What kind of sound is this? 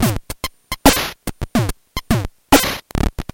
shuffly and crunchy
nintendo, lsdj, loop, gameboy